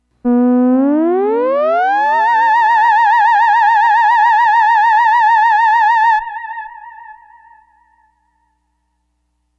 scifi scare d
Mono. Wet. Same classic sound as scare c but recorded wet with slight delay and reverb.
analog, electrical, electronic, horror, quavering, retro, scare, scary, sci-fi, scifi-sound-2, spooky, theramin, theremin, tremolo, tremulous, variation-2, weird